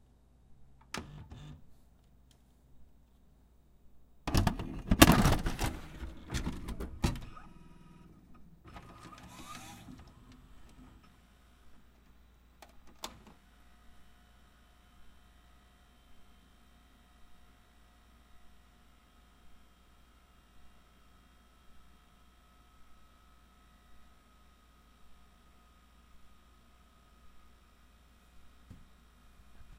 Putting a tape in my VCR and letting it play. Different sounding from vcr01.wavRecorded with the built-in mics on my Zoom H4.
click, foley, plastic, request, tape, vcr